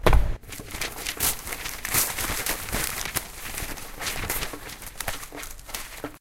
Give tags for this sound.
foley
lescorts
paper
ripping

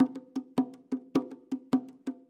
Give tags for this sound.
bongo
drum
loop
percussion

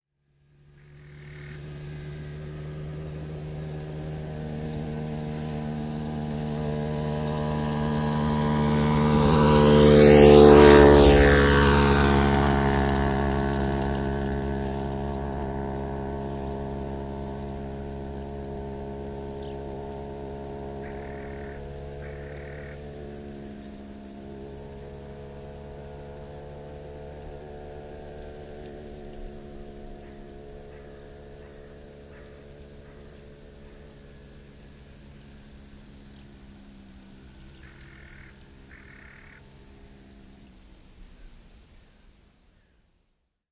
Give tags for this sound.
Field-Recording
Finland
Finnish-Broadcasting-Company
Motorbikes
Motorcycling
Soundfx
Suomi
Tehosteet
Yle
Yleisradio

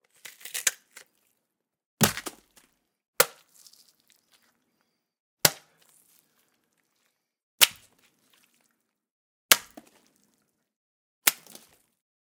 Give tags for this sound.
impact
blood
punch
crunch
fruit
splatter
splat
guts
splash
flesh
gush
watermelon
human
slush
bones

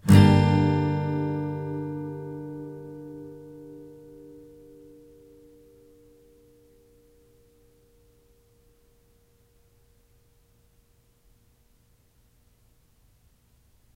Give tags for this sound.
acoustic
chord
guitar
strummed

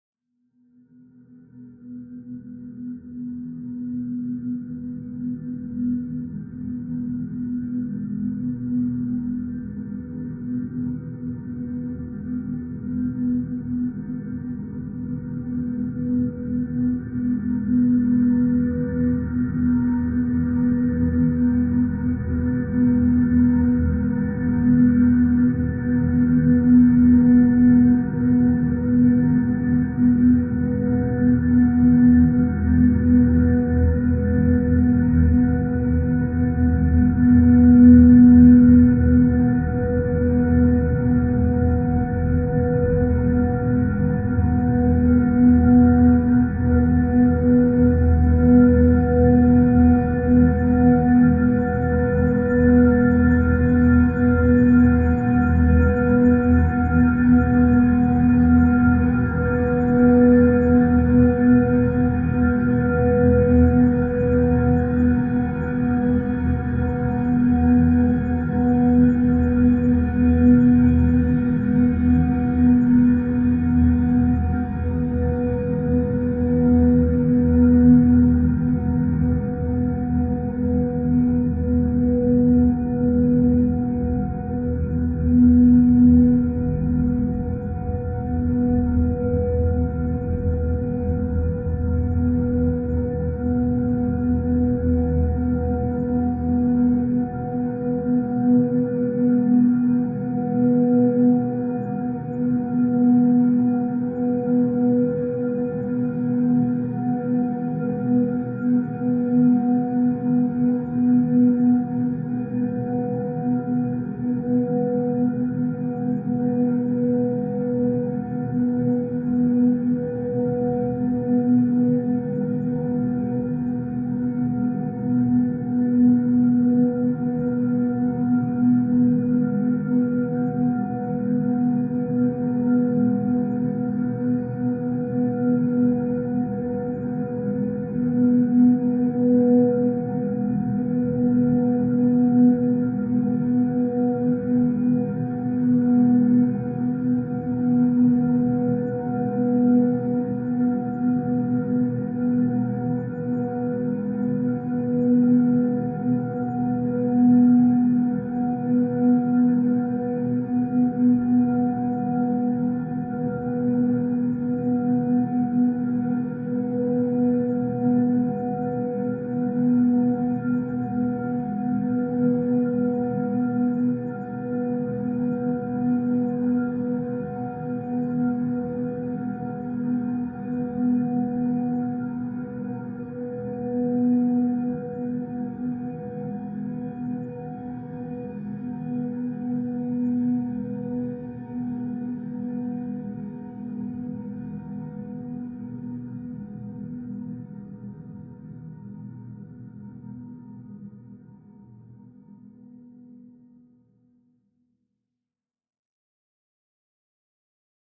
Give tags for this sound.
atmosphere
multisample
ambient
drone

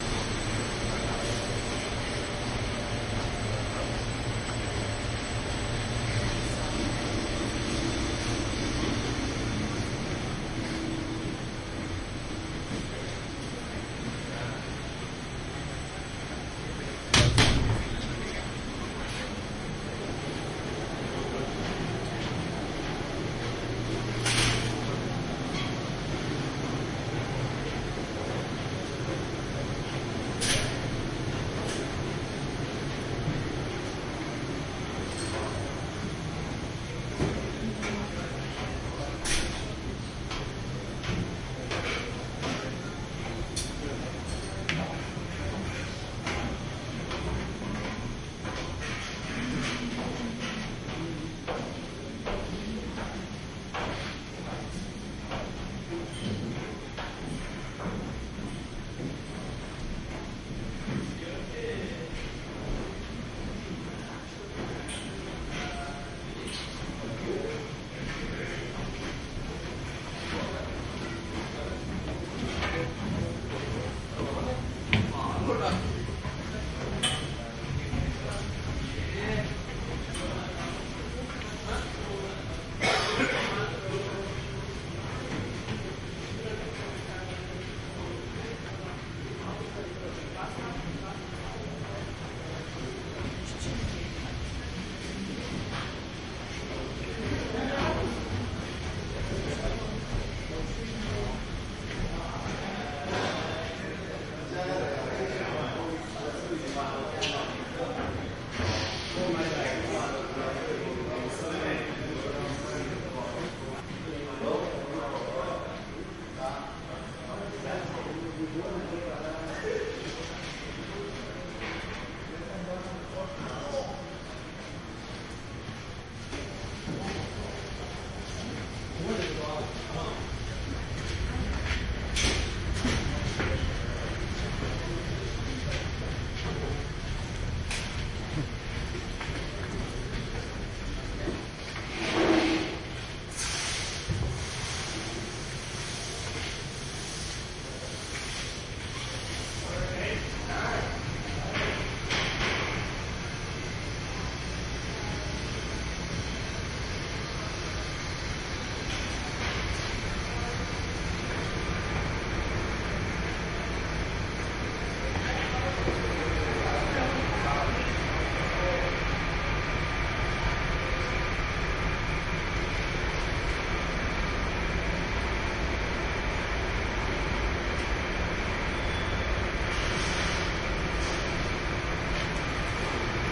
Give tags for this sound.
ambiance
ambience
atmosphere
Cruiseship
engine
field-recording
footsteps
hall
hallway
indoor
machinery
people
soundscape
voices

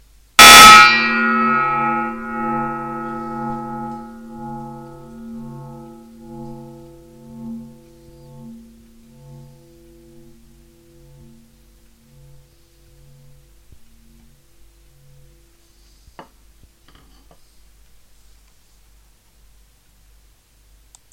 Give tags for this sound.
music
toolbox
bits
fragments
lumps
melody
movie

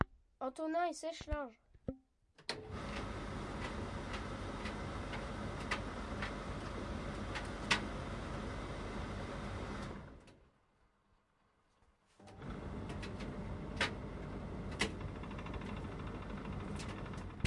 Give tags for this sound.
messac
sonicsnaps
france

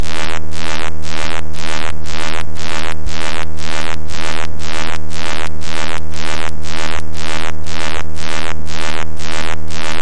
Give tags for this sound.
Buzzing
Machinery
Noise
Machine
Buzz
Artificial
Industrial
Factory

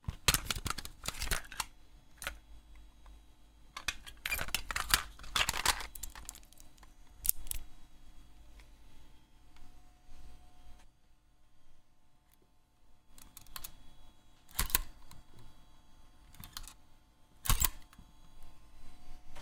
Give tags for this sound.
real-sounds
tape

